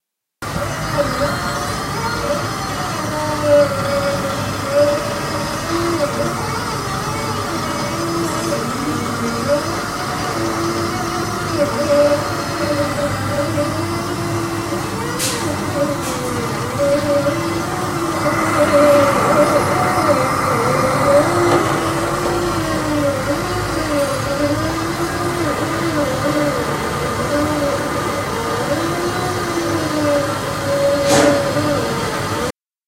Pava calentando
A water boiler looks like it's gonna explote.